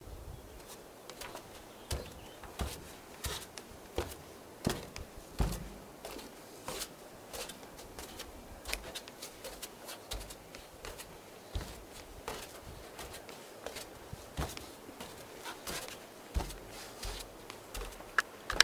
Walking barefoot on wooden deck
A sound of someone walking barefoot on a wooden deck. The person's feet somewhat drag across the deck. The sound of distant birds is faintly audible. Great audio to incorporate into a movie or video.
barefoot, deck, feet, floor, foot, step, steps, walk, walking, wood, wooden